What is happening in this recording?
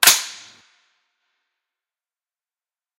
A Bushmaster's bolt being released.